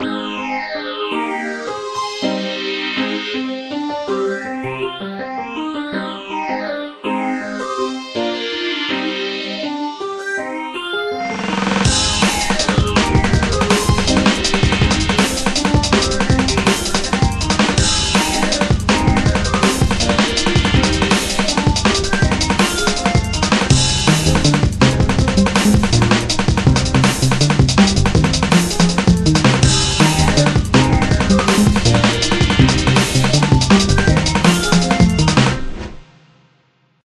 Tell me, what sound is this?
side stepping
a small bit of a song that i made that i think sounds cool with beat programed on reasons redrum with phased piano and strings.